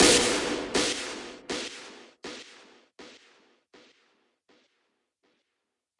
Echo Snare
A layered cymbal crash!
pro-tools, Crash, Snare, loud